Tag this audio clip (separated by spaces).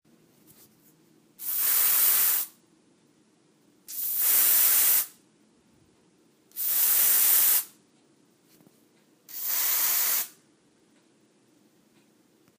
sound air spray